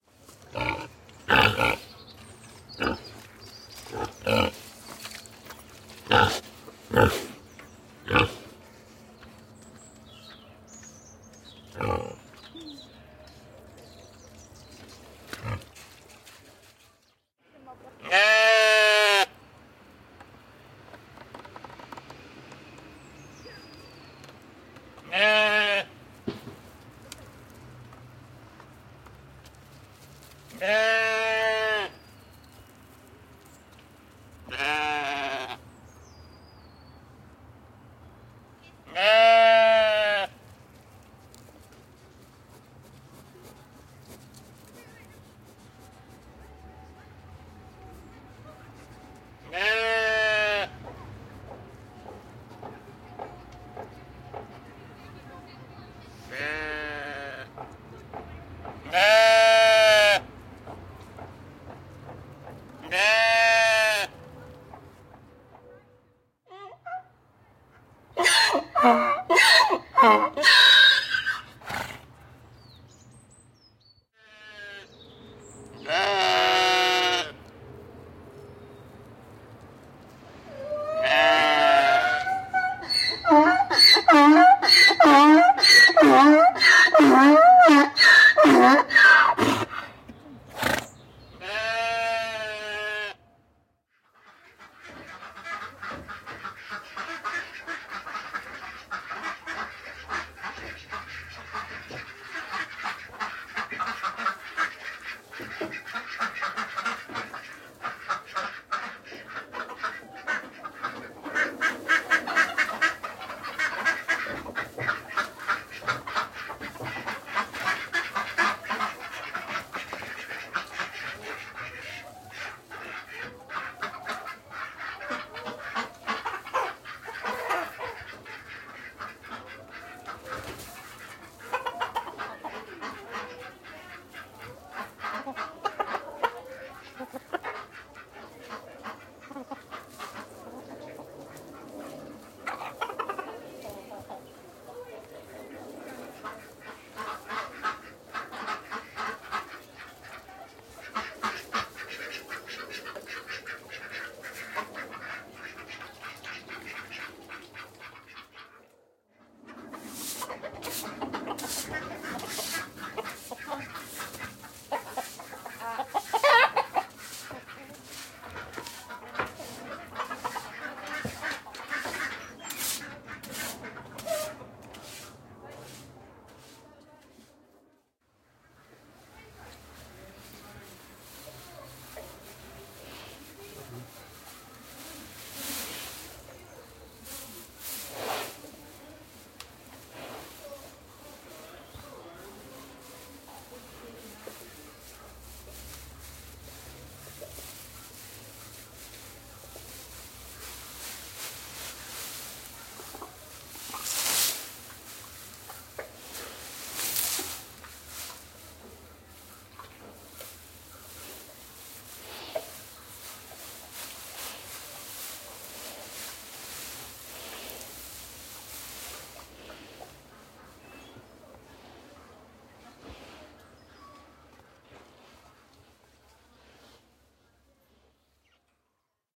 Farmyard Sounds - Pig, Sheep, Donkey, Ducks, Chickens
Recorded at Hackney City Farm in London, UK. Many thanks to the staff and volunteers who showed me around.
Notes:
0.00 - 0.10 : Pigs
0.10 - 1.06 : Sheep / Ambience
1.06 - 1.32 : Donkeys (and a few guest sheep)
1.32 - 2.39 : Ducks and chickens.
2.39 - 2.58 : Mostly chickens and sweeping the yard.
2.58 - end : Livestock bedding down in hay.
hen, animals, quack, donkey, nature, ducks, countryside, bray, sheep, cluck, snort, oink, bird, bleating, hackney, farmyard, goat, baa